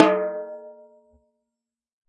A 1-shot sample taken of a 12-inch diameter, 8-inch deep tom-tom, recorded with an Equitek E100 close-mic and two
Peavey electret condenser microphones in an XY pair.
Notes for samples in this pack:
Tuning:
LP = Low Pitch
MP = Medium Pitch
HP = High Pitch
VHP = Very High Pitch
Playing style:
Hd = Head Strike
HdC = Head-Center Strike
HdE = Head-Edge Strike
RS = Rimshot (Simultaneous Head and Rim) Strike
Rm = Rim Strike